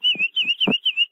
Me making bird sounds :-)
bird, tweet, birdsong